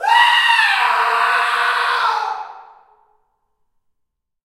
Male Scream 8
Male screaming in a reverberant hall.
Recorded with:
Zoom H4n
agony, bronius, cry, dungeon, fear, human, jorick, male, pain, reverb, schrill, screak, scream, screech, shriek, squall, squeal, torment, yell